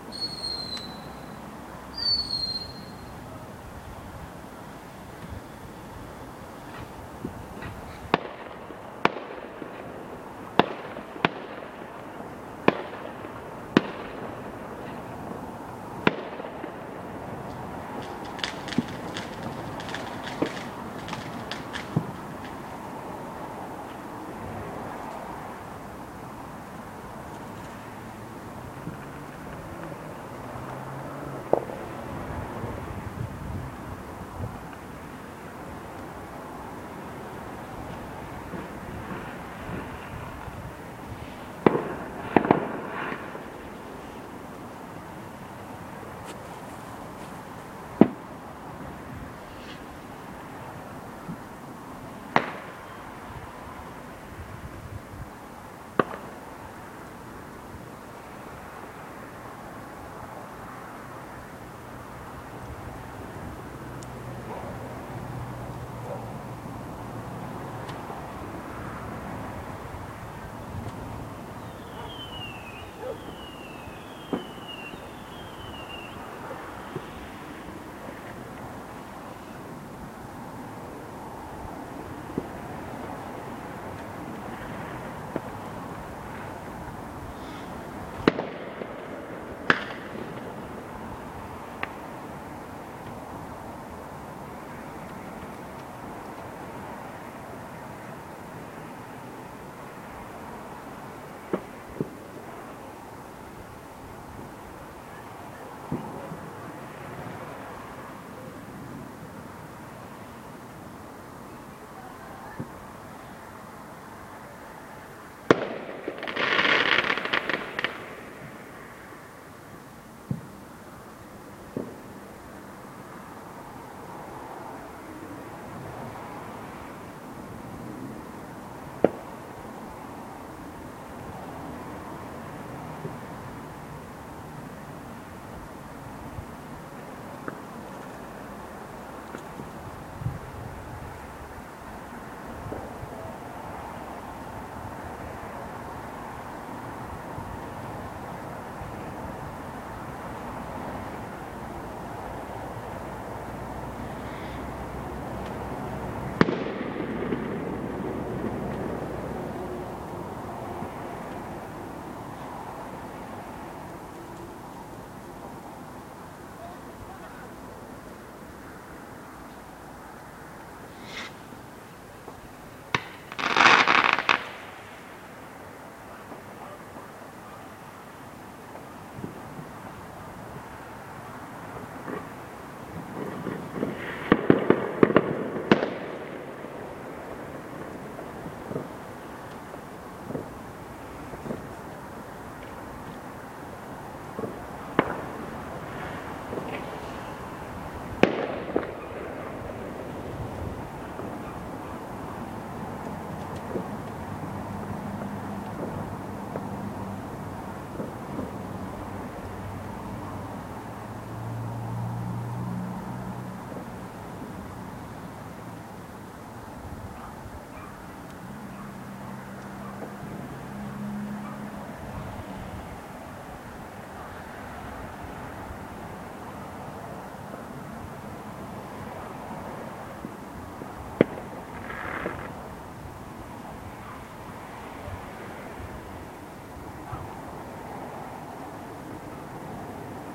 Fireworks exploding in a suburb on Bonfire Night 2014 (5th November).